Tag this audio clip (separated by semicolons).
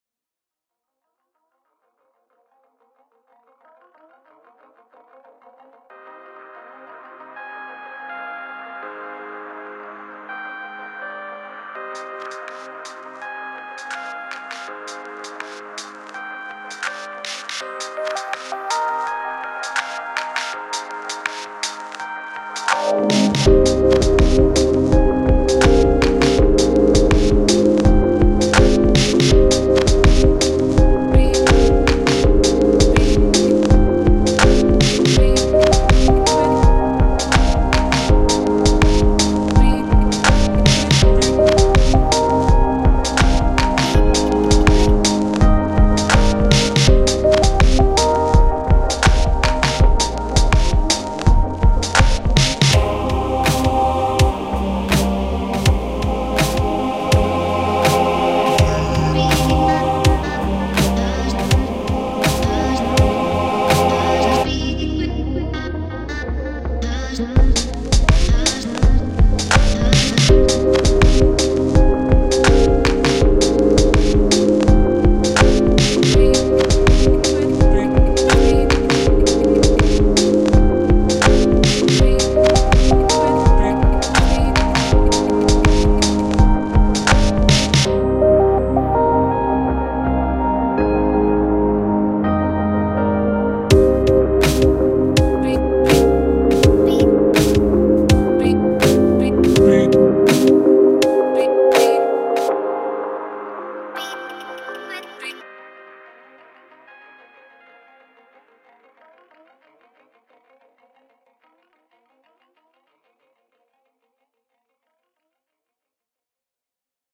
music; vacuum; improvised; ambient; chill; garageband; dub